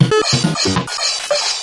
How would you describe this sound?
KTC loop-03
very crazy loop from my circuit-bent keyboard
abstract, brokebeat, circuit-bent, cymbals, glitch, idm, loop, pitch